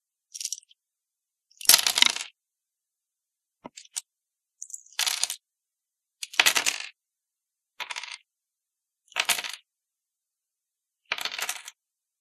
Pieces falling on wood table
Can be used for coins or pieces falling or being deposited or bought. Used Audacity to record.